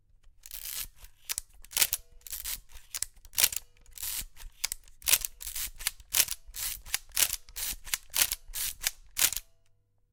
Old SLR film camera - Quick series of shutter cocking and pressing
Series of quick loading / cocking shutter using film advance lever and then taking a photo by clicking on shutter button.
Recorded with Rode NT1-A microphone on a Zoom H5 recorder.